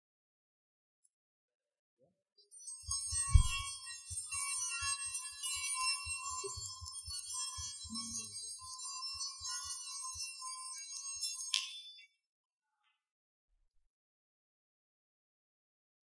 This is a foreground sound. This is the sound of Chinese Iron Balls. It is a metal and heavenly sound. They have been cleaned noise that was in the sound. The sound has been recorded with a Zoom H4n recorder.
balls, chinese, clang, heavenly, iron, metal, metallic, ting